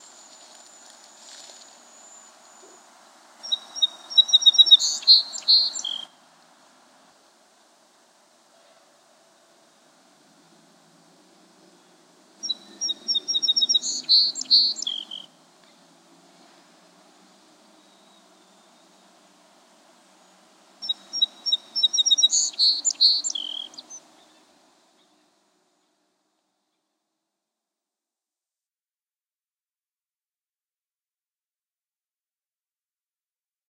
One of my favorite bird songs, the Song Sparrow. I recorded this proud guy in a stand of cattails near a small lake.
Recorder: Zoom H4-N
Microphone: Rode NTG-2
nature, field, song-sparrow, forest, woods, field-recording